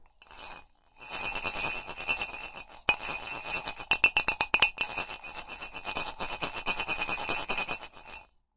d100cuproll(GATE)
A 100-sided die (kind of like a golf ball with a few BBs inside) is swirling around in a coffee cup.The sound has been gated lightly for noise reduction.
dice, swirl, exotic, gated, rolling, coffee-cup